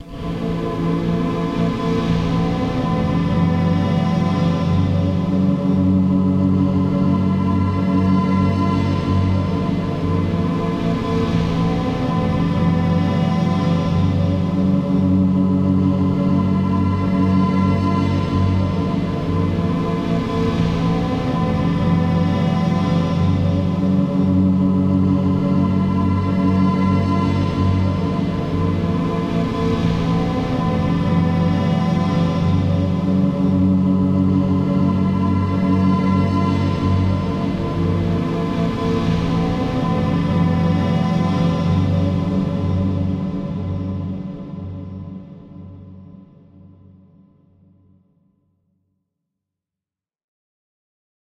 another drone
I recorded a bunch of guitar noodling to a Fostex X-26 four-track recorder, then recorded the tape to my computer with Goldwave wherein I cut out a segment which was looped and then processed with reverb effects and other VSTs in FL Studio.